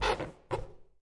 Percussive sounds made with a balloon.
percussion, rubber, acoustic, balloon